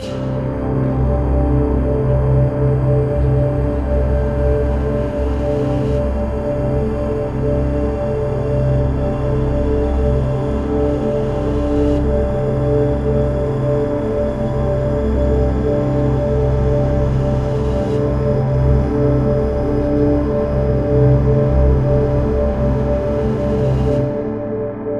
padloop80bpm8bars5
padloop experiment c 80bpm
experimental, ambient, soundscape, evolving, drone